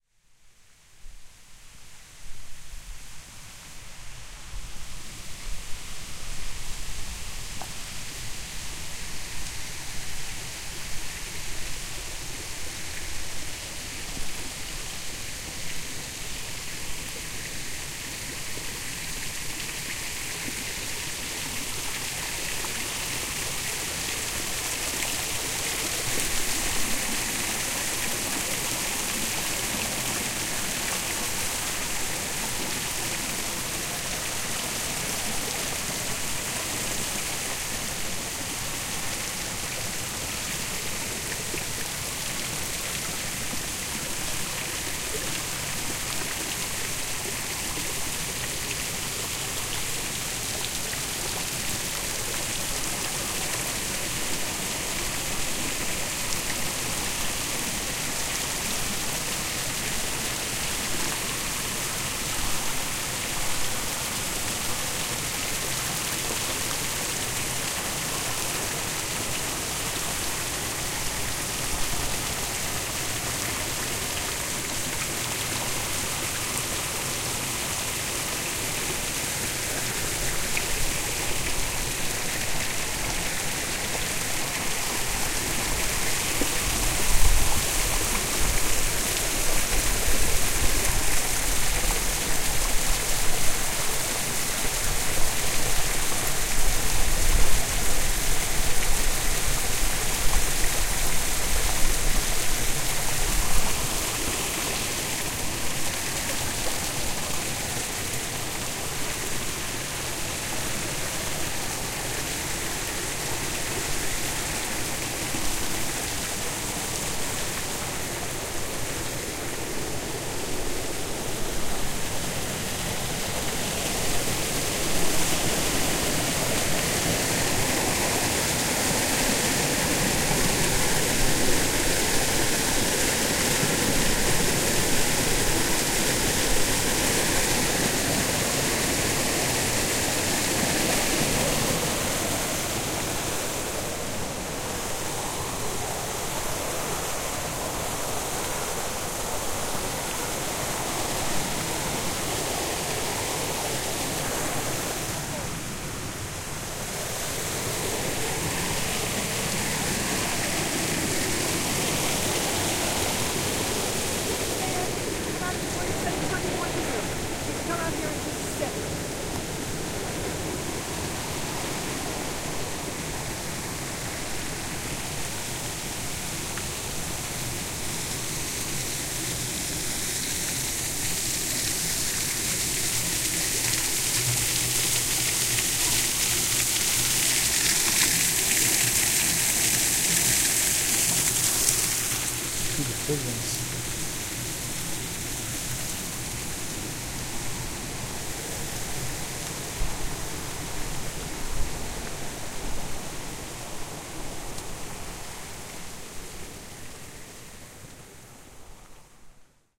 many fountains
We cross a long, narrow grassy strip and enter the main fountain area of the gardens. I walk along a wall with water flowing over it and with carved stone lion heads protruding from it spouting water into a trough along its base. There are occasionally larger fountains at the top of the wall, and in the middle of the row I climb some steps to one of them.
Recorded using a MicroTrack with the included "T" stereo mic.
fountains, gardens, longwood, ambience